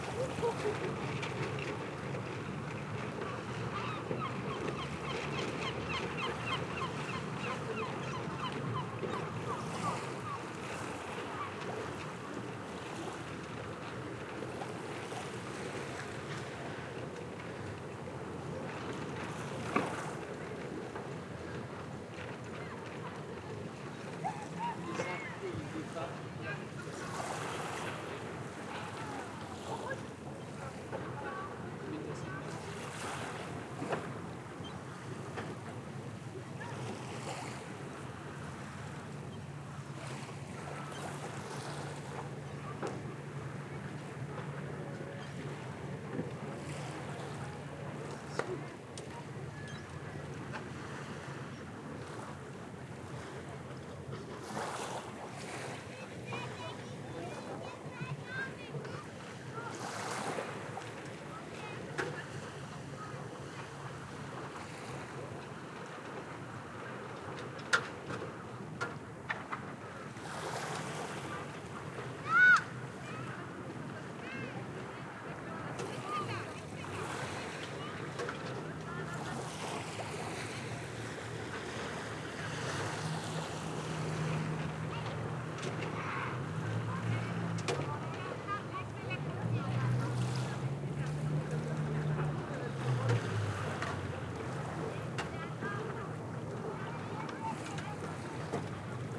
Harbor ambiance
OKM II binaural capsules
ZoomH5
Senheiser MKE600